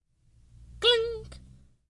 clank cartoon
a sound for cartoon animation
animados, cartoon, cartoon-sound, clank, comic, sound-effects